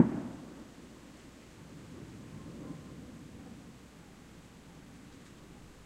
Small explosion - firecracker during a fiesta in the Sacred Valley, Cuzco, Peru. Long natural mountain echo.Recorded with a Canon s21s
firecracker, explosion